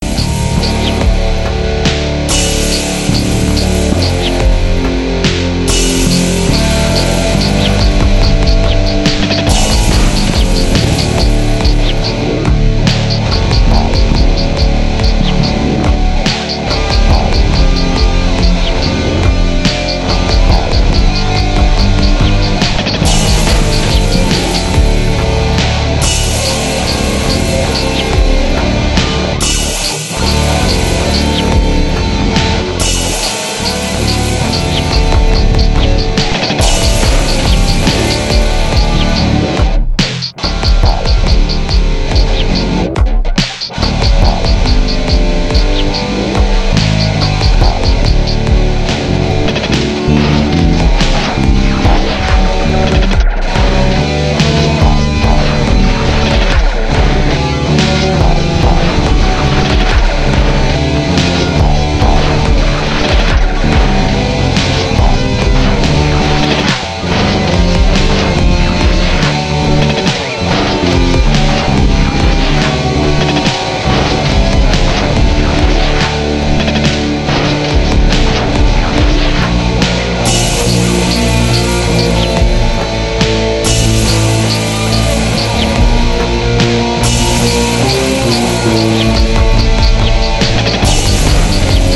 Power Donk II can be used with the first loop. They are both in my 'Loopy Tunes' pack. Equipment Zoom R8, Ibenez Guitar, LTD Bass and I did the Donk with Hydrogen Advanced Drum Software.